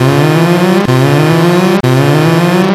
I recreated this alarm sound by generating unpleasant noises and sounds on audacity, and add some reverberation and echo.